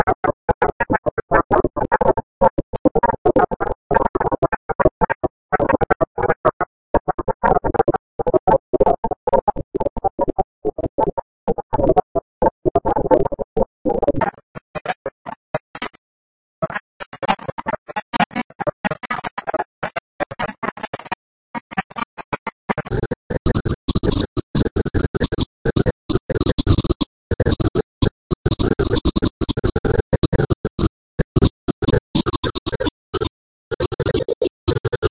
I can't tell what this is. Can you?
The files are small, so download time is quick.
Experimentation with programs that i "Rediscovered". I didn't think these "New" programs were worthy of using, but to my surprise, they are actually extremely interesting to work with!
These are really some bizarre effects that were produced with the new programs.
Linux iz c00l!
///////////// Enjoy!
Nonsense, Crazy, Sci-fi, Alien, Unusual, Alien-Species, Vocal, Outer-Space, Paranormal, Weird, Strange